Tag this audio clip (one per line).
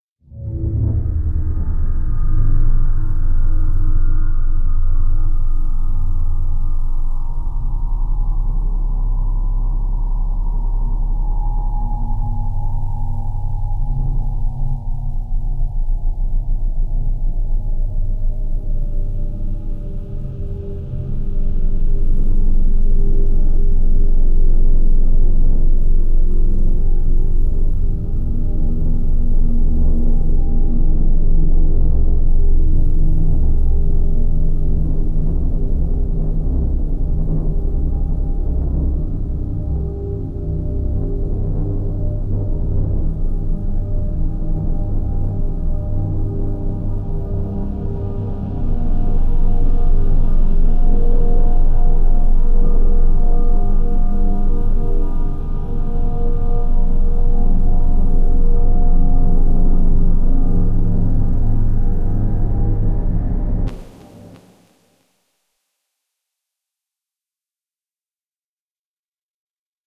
Ghost
Suspense
Thriller
Drone
zombies
Horror
thrill
paranormal
zombie
scared
Scary